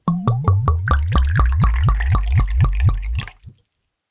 Liquor Bottle Pour 01
A clip of me pouring liquor into a glass.
pouring, 16bit